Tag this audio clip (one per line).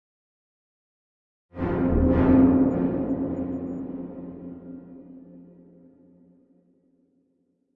electro
drum
music
sci-fi
rumble
atmosphere
synth
city